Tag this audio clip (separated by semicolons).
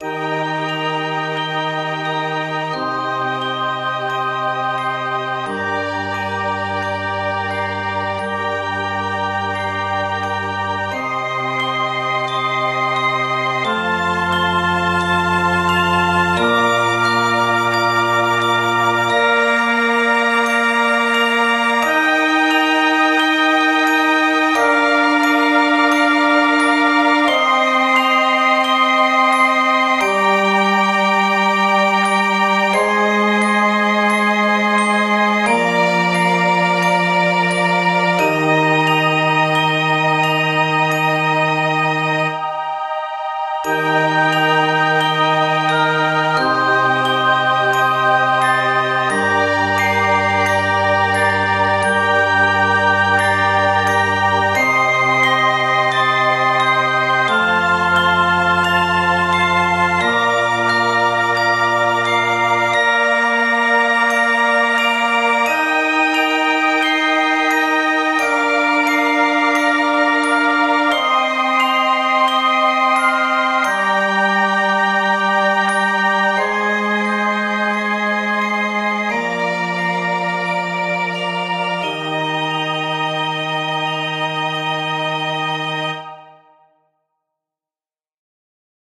chords; melodic; spirit; harmony; angel; mysterious; ethereal; voices; soft